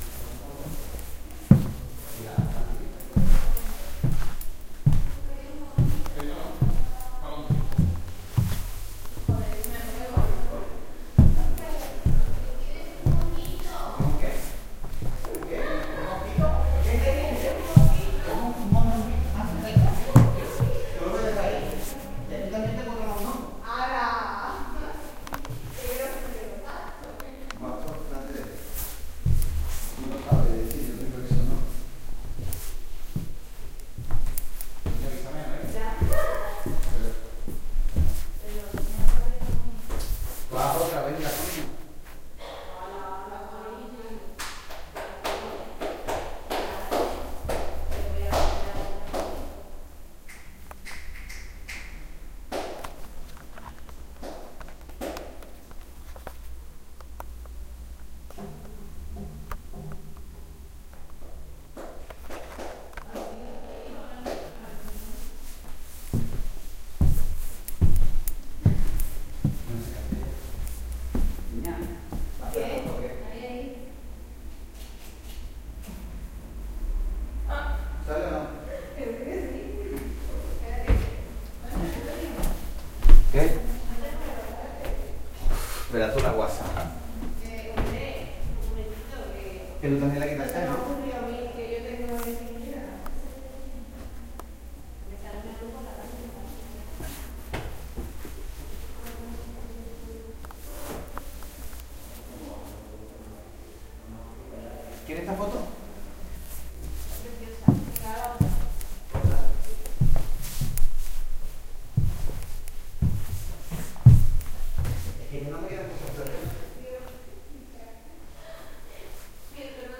footsteps on wooden floor, female and male voices resonating inside an art gallery with few (but noisy enough) visitors. Edirol R09 internal mics